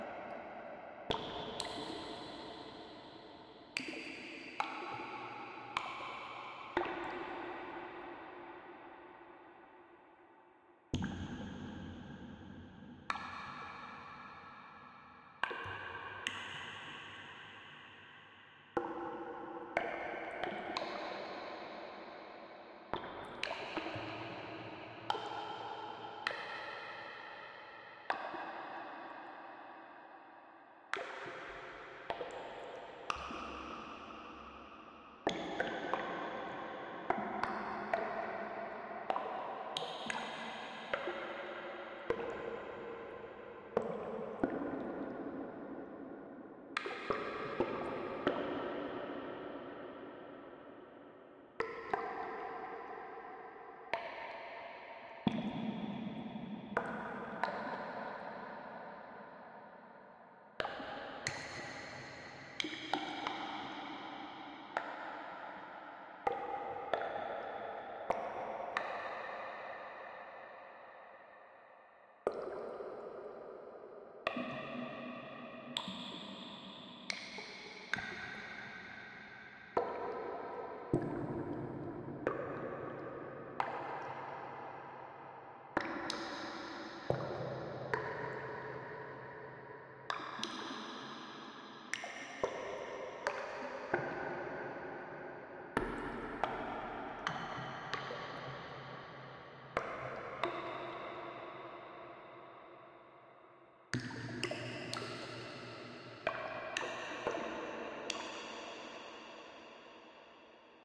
Water drops inside a large cave. Synthesized with VCV rack.
Water, Synthethic, Cave, Reverb